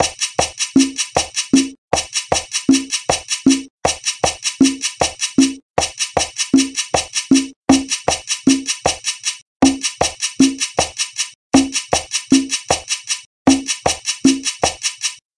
A drum pattern in 5/4 time. This is my second pack.
05-04, 4, kit, 05, drum, 04, pattern